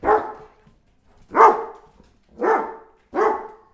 Just a dog barking